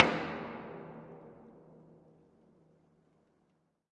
Recordings of different percussive sounds from abandoned small wave power plant. Tascam DR-100.
drum, metal